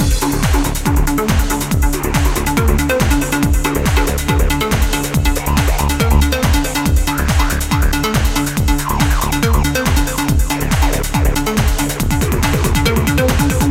An instrumental trancy loop.